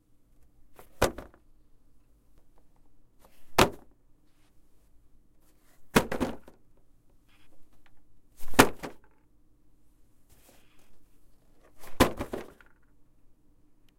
foley Cardboard Box Drop
Dropping cardboard box, different intensity.